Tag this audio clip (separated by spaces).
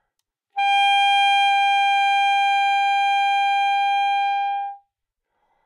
alto G5 good-sounds multisample neumann-U87 sax single-note